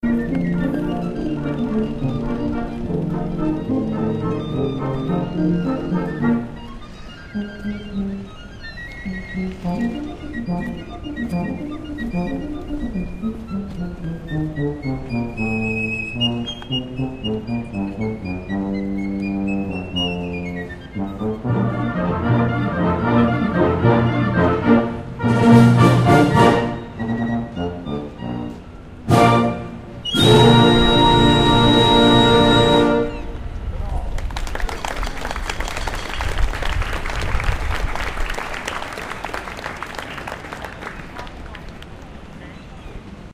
Band in the Park
A military band play a tune in a park in Stockholm, Sweden. The end of a tune and applause can be heard.
band, sweden